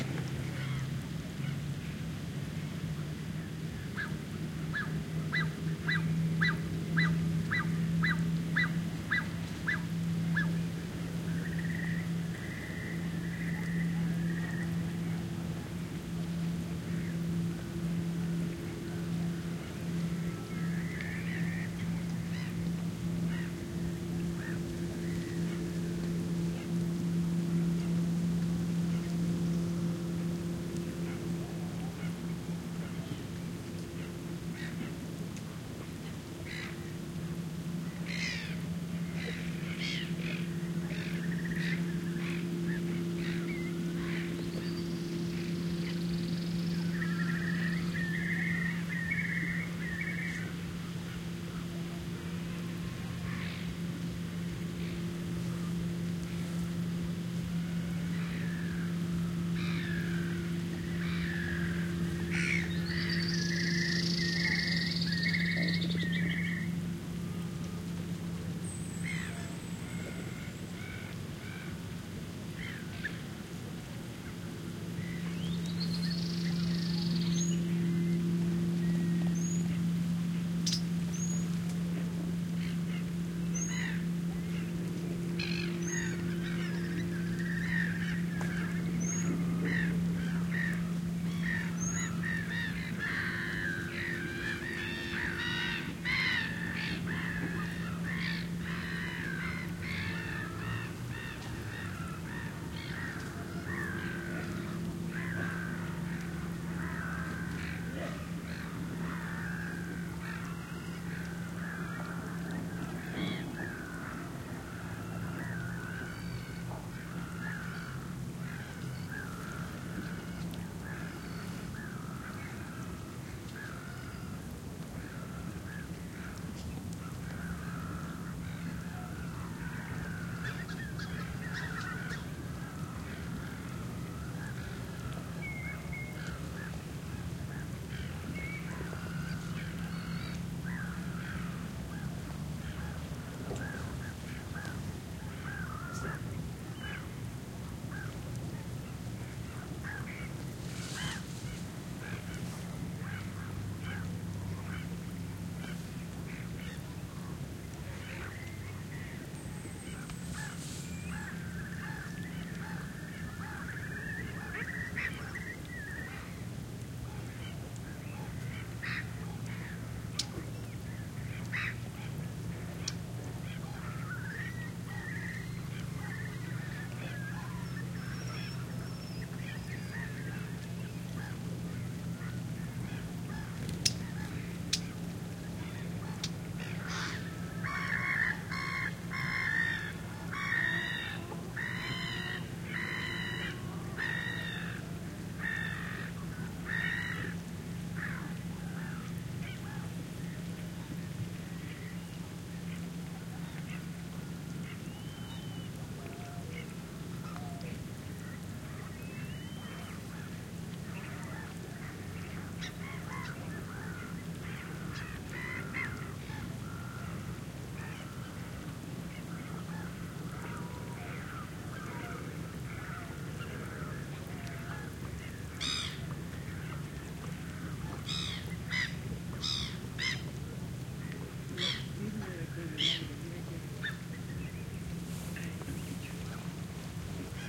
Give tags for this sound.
engine
low-tide
seagulls

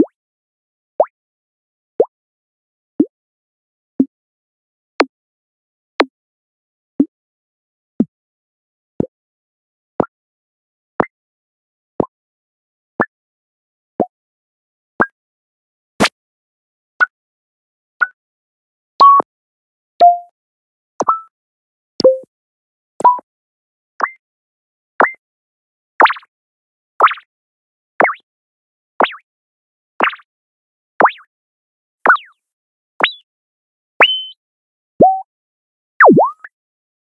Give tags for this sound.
buttons
beeps
website

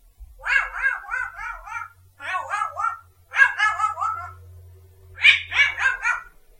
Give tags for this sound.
African
Gray
Grey
imitation
parrot

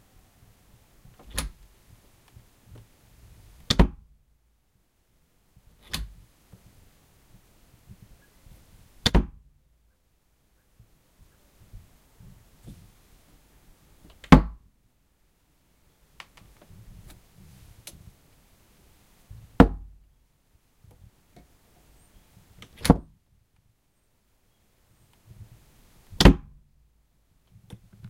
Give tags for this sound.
Open
Door
Shut
Wooden
Close

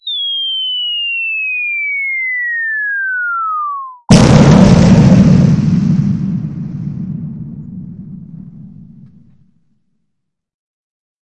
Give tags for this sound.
Bomb
Explosion
War

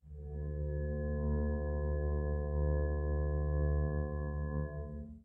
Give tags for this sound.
bowed
experimental
guitar
note
real
string

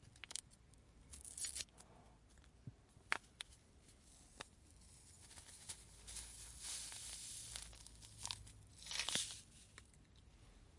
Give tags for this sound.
OWI
pour
coffee
sand
sugar
sashay

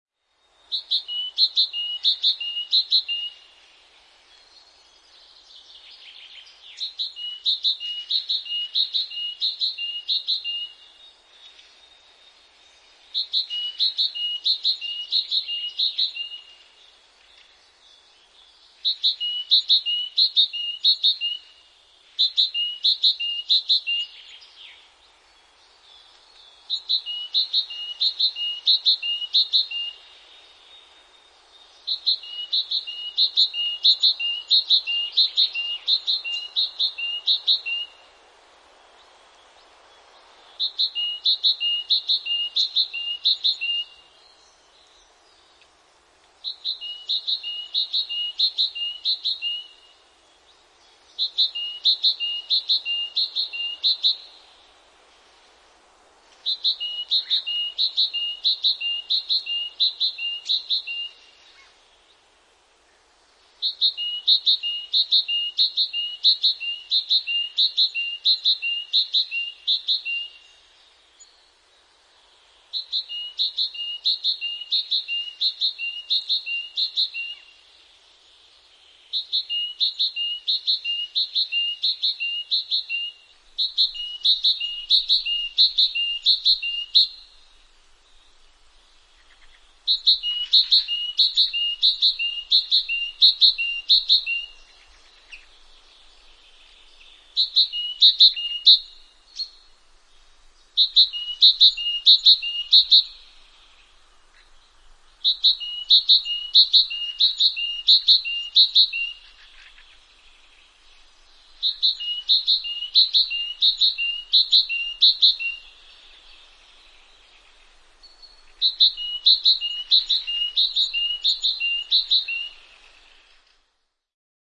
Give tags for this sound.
Birdsong
Forest
Linnunlaulu
Linnut
Finland
Great-tit
Field-Recording
Suomi
Yleisradio
Finnish-Broadcasting-Company
Bird
Nature
Tehosteet
Talitiainen
Yle
Lintu
Birds
Soundfx
Spring
Luonto

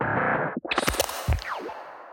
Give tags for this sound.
harsh
lesson
square-wave
electronic
breakcore
synthesized
glitch
bunt
rekombinacje
tracker
drill
digital
noise
lo-fi
NoizDumpster
synth-percussion

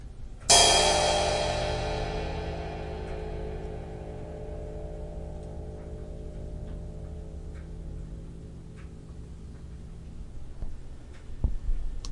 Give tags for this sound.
drum
music

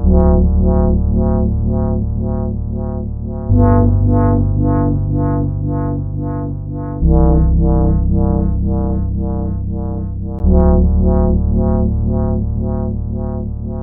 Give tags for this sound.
69
Arpeggio
BPM
electronic
FM
loop
Synth

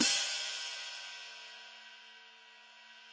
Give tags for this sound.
hi-hat
velocity
cymbal
multisample
1-shot

